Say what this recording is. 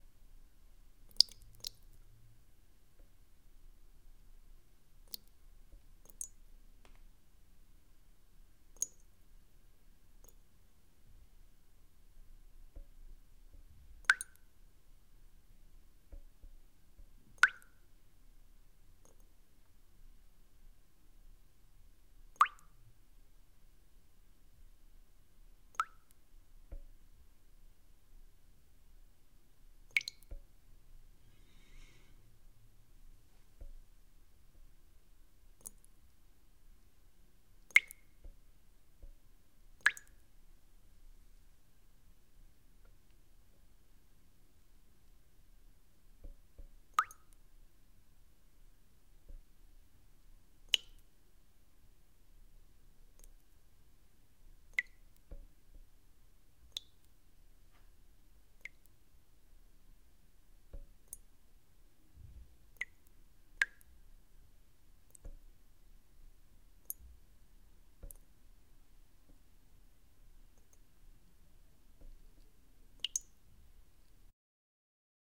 Water drops 1
Some water drops in a bowl, made manually with a spoon (and love).
Recorded on a Zoom H4N and a large membrane cardioid mic.